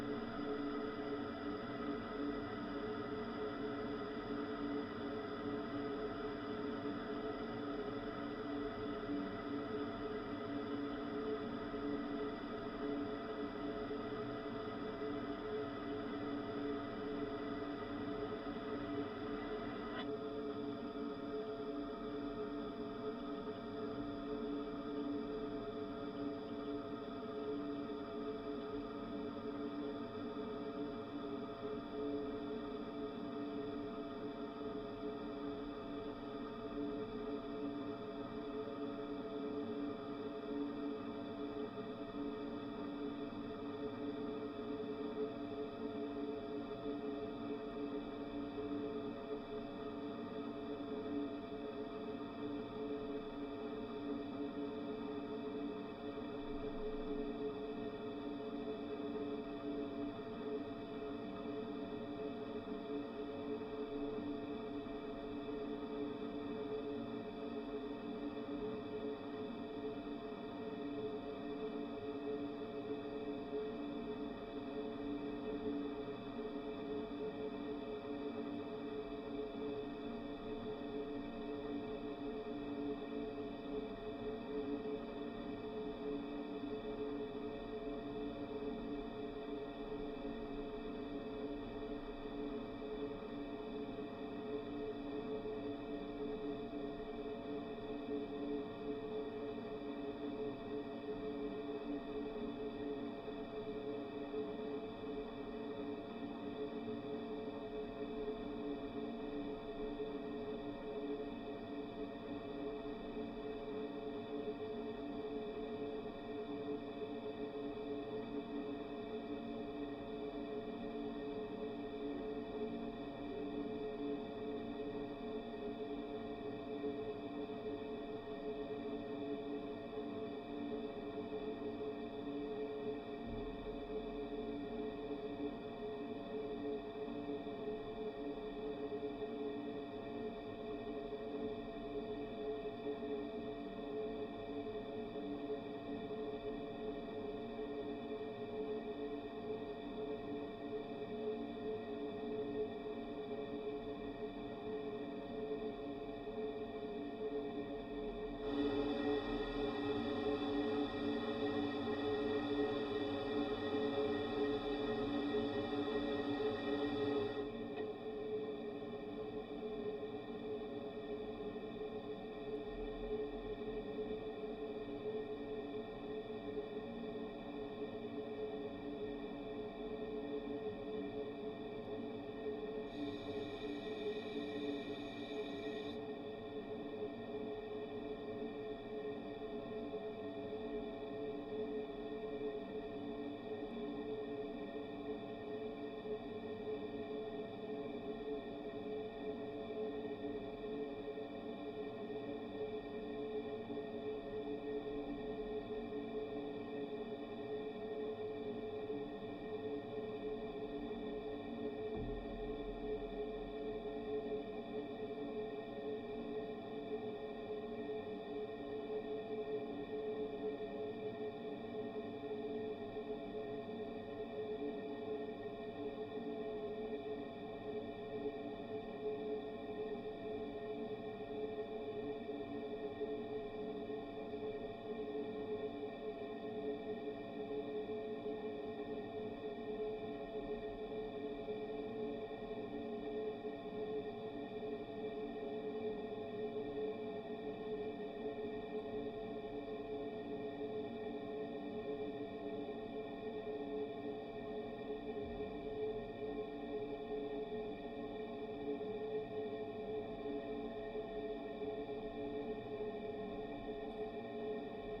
Contact mic attached to tap in a bathroom, you can hear many connecting water activities. Recorded on Barcus Berry 4000 mic and Tascam DR-100 mkII recorder.